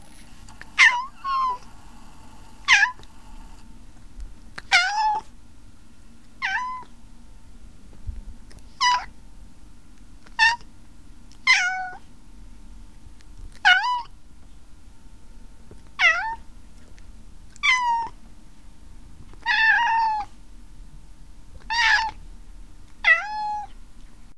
Maine Coon cat in demanding mood
cat, maine-coon